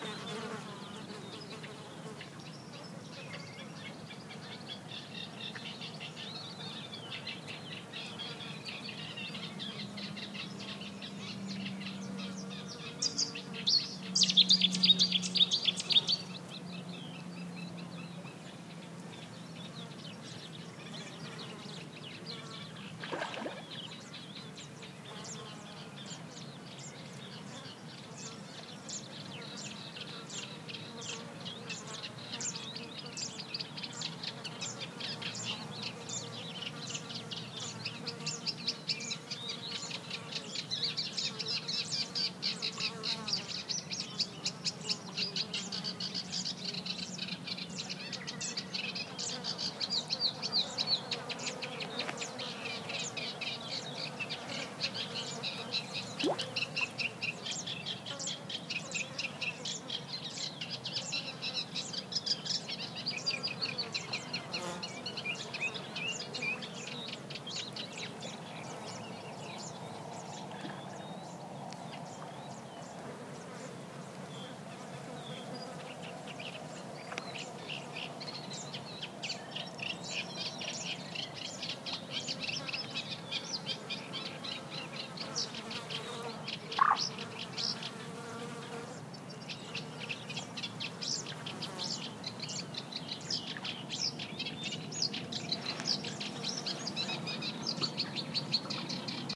20060425.channel.ambiance02
ambiance near a channel. Insects, frogs croaking and several species of birds. Sennheiser ME62 > iRiver H120 / ambiente en un canal de marisma, con insectos, ranas y diversos pajaros